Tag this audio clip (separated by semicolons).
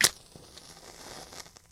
snap; click; match-strike